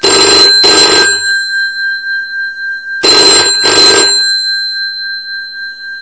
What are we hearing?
BT 706 Telephone Bell